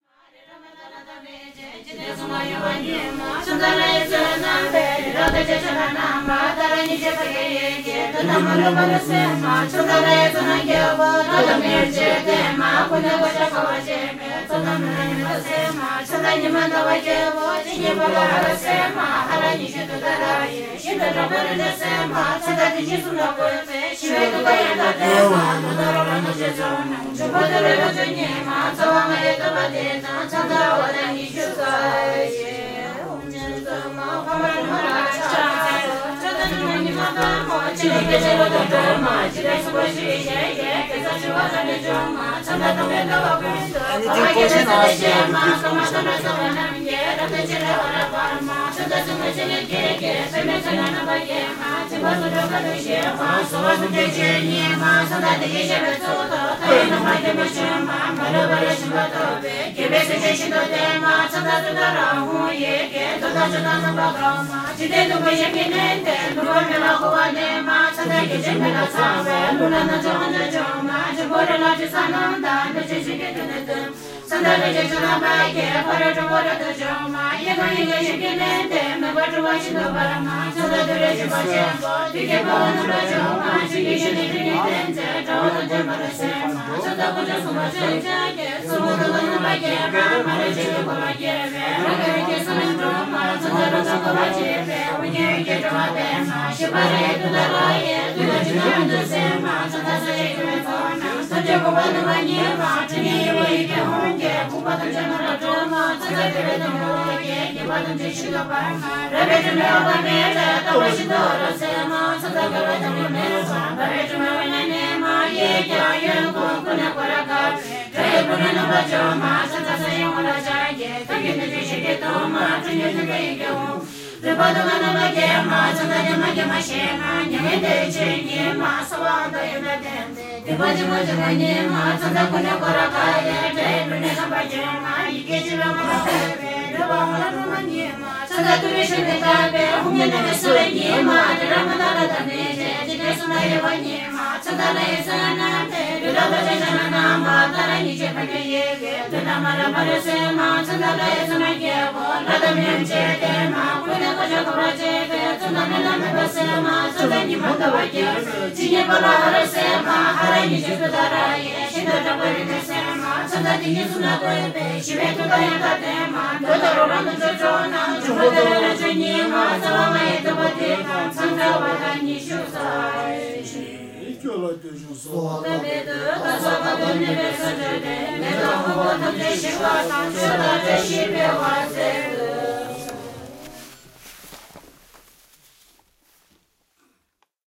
Field recording at Chemre Gompa Ladakh, India. Recorded by Sony PCM-D1.